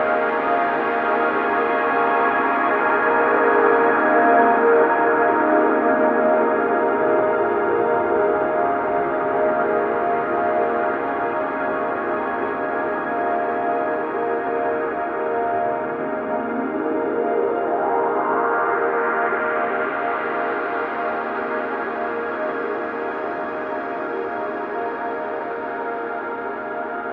Analogue Pt1 24-1
yet another mid frequency bandpassed setting with the slow LFO of the RS3, very subtle Ringmod and modulated analog delay, working more as a chorus than delay.
ambient, prophet08, rs3, string-pad